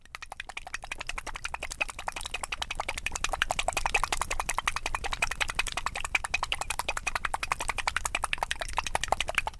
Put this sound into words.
Gravado para a disciplina de Captação e Edição de Áudio do curso Rádio, TV e Internet, Universidade Anhembi Morumbi. São Paulo-SP. Brasil.
Mic. Condensador feito com pequeno recipiente de álcool em gel.